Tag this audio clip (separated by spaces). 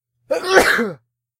flu ill sick single sneeze sneezing cold